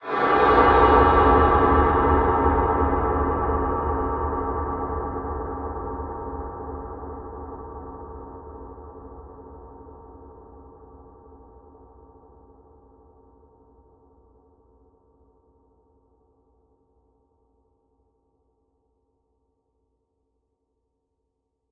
a sound effect that i created using a huge reverb. it sounds like a gong.